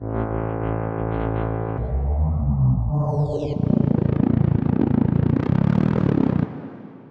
Remix samples from My Style on Noodles Recordings. Circa 2006.
remix, samples